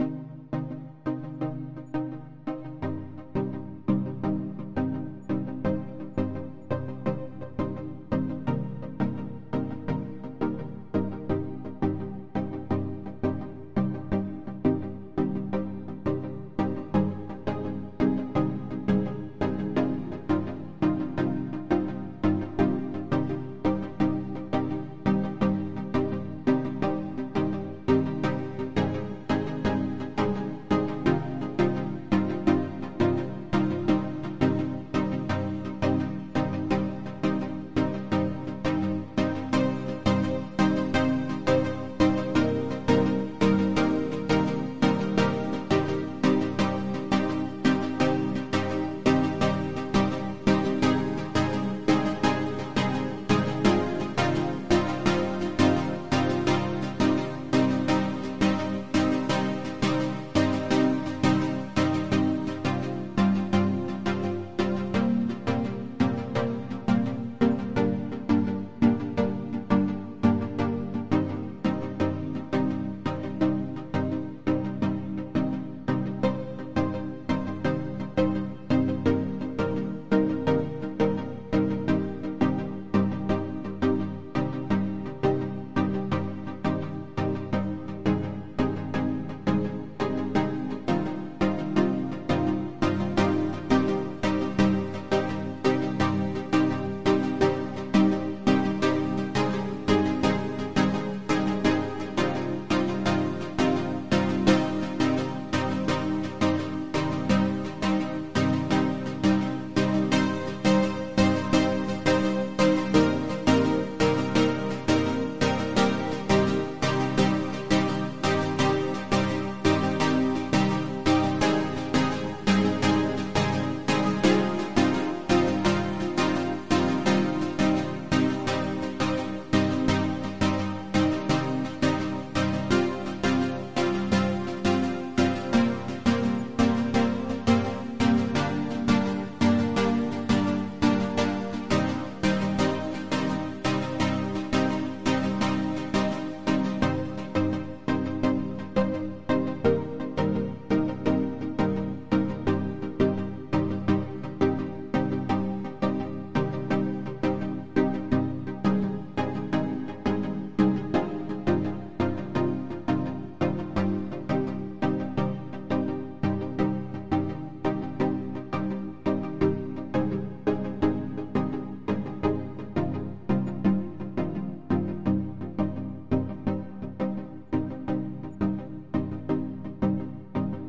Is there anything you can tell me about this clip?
Progressive chords lead.
Synths:Ableton live & Silenth1.
bounce, chords, club, dance, electro, house, leads, music, Progressive, rave, techno, trance